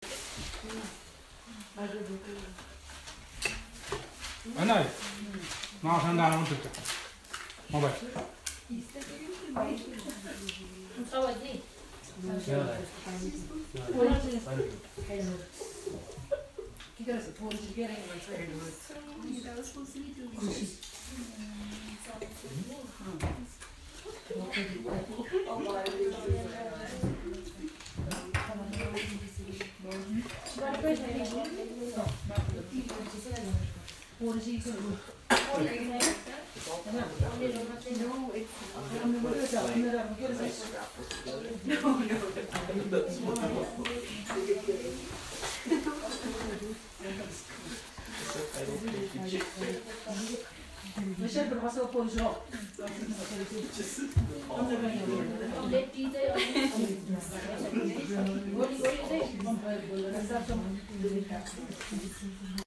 Himalaya Lodge Nepal atmosphere interior
quite conversations in a remote Himalayan lodge